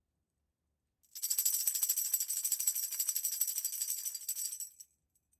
a tambourine being shaken.
fills, foley, music